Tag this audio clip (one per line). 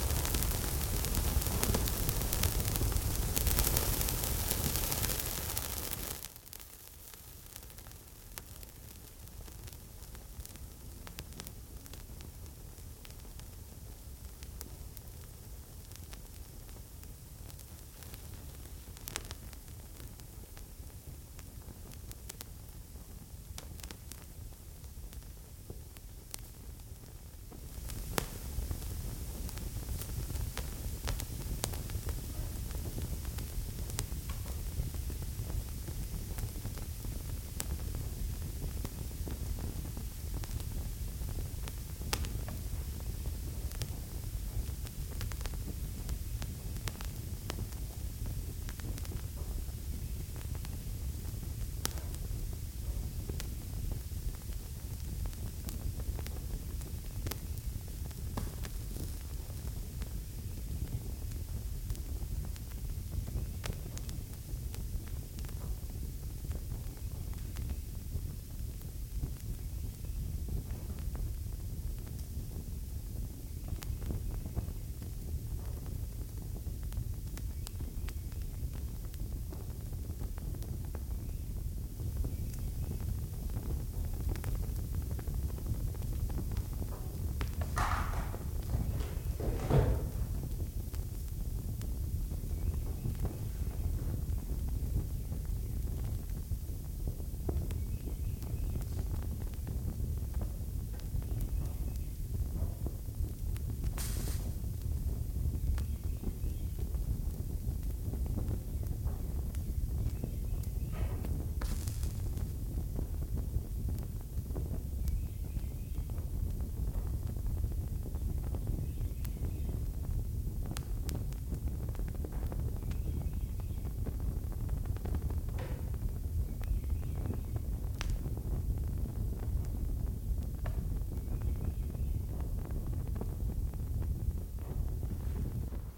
Medieval
flame
Torch
fantasy